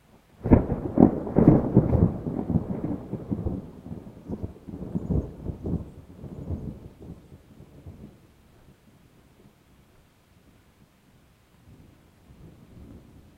Thunder roll 12
This is a recording of distant rolling thunder from a thunderstorm that the Puget Sound (WA) experienced later in the afternoon (around 4-5pm) on 9-15-2013. I recorded this from Everett, Washington with a Samson C01U USB Studio Condenser; post-processed with Audacity.
ambient, thunder-clap, lightning, west-coast, thunder, weather, field-recording, storm, north-america, thunder-roll